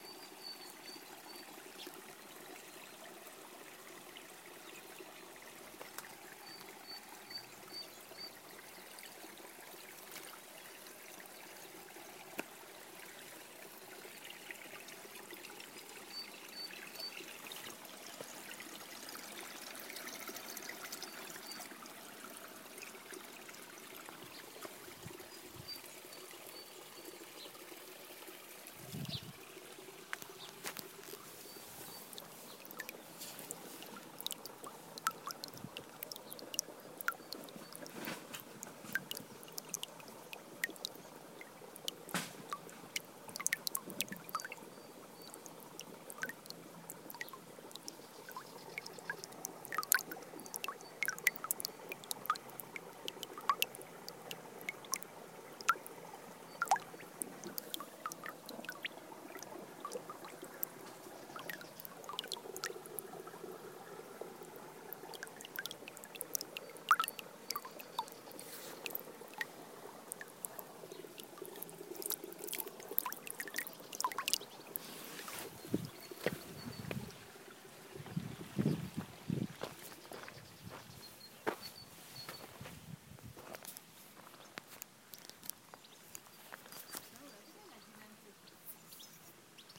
Zavitan River
Recording I made of water flowing in the "Zavitan" river in northern Israel (Ramat Hagolan).
brook, creekdrops, israel, nature, river, stream, zavitan